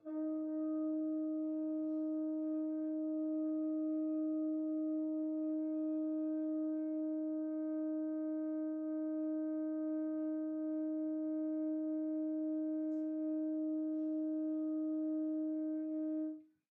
One-shot from Versilian Studios Chamber Orchestra 2: Community Edition sampling project.
Instrument family: Brass
Instrument: F Horn
Articulation: muted sustain
Note: D#4
Midi note: 63
Midi velocity (center): 31
Microphone: 2x Rode NT1-A spaced pair, 1 AT Pro 37 overhead, 1 sE2200aII close
Performer: M. Oprean